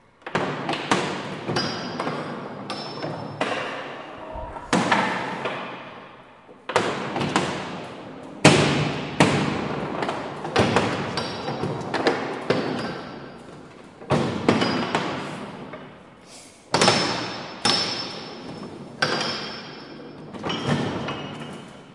Sounds recorded at Colégio João Paulo II school, Braga, Portugal.